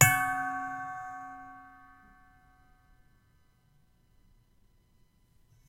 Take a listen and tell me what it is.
This is a bell / chime sound
I hit a bowl to create this sound
Recorded on a Yetti Blue Microphone 2015
ambient, Bell, chime, Ding, Gong, Ping, Ring, Ting